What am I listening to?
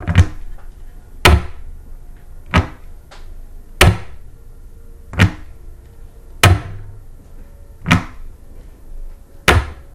opening and closing a kitchen cabinet
cabinet, close, kitchen, open